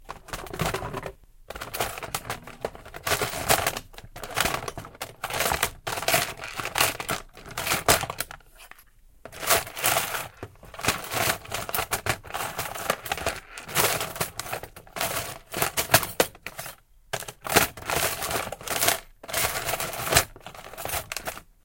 Searching in toolbox
searching, box, toolbox, search